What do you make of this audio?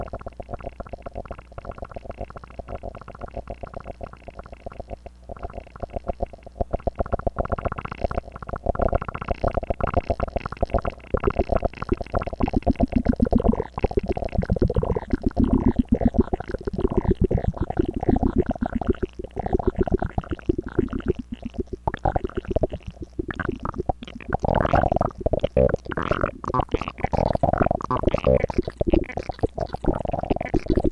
Playing some crazy sounds from a Nord Modular through some pitch shifters. The noise is created with a sample and hold unit running at audio frequencies.
sound-design, squelch, dribble